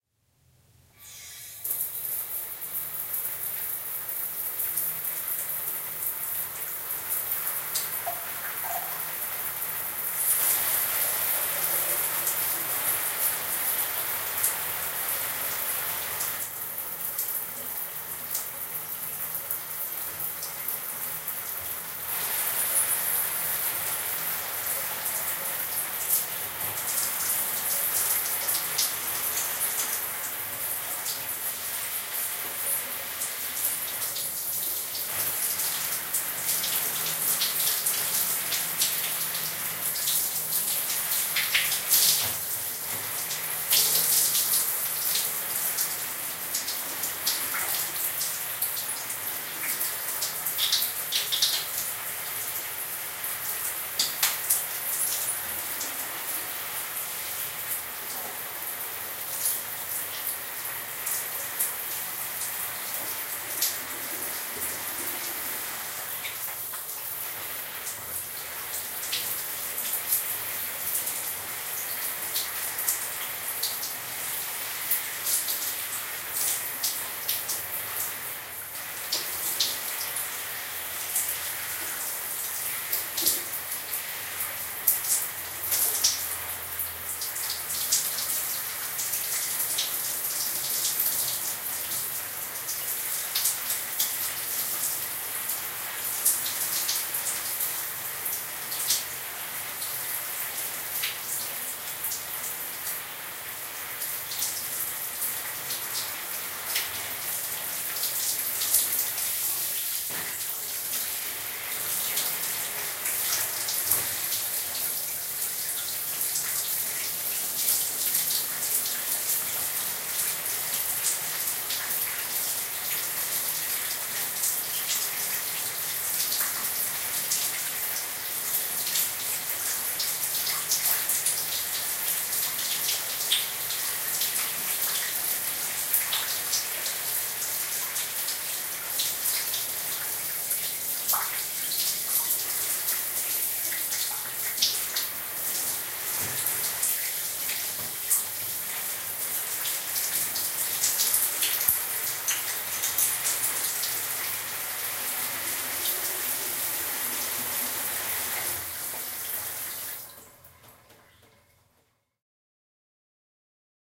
20070725 lomanlaan shower s
Recording of a person taking a shower. Used in a study of noise-filtering.